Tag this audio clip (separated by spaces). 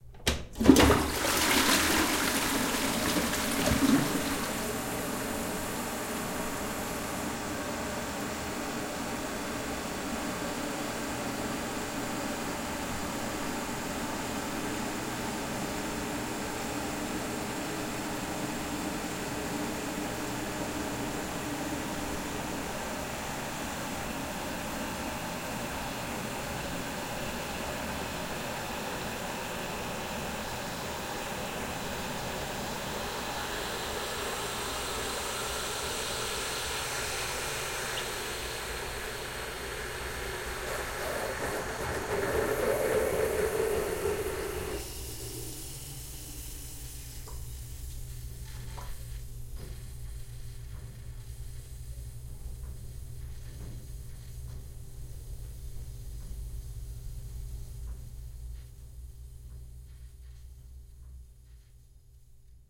toilet flushing trident caroma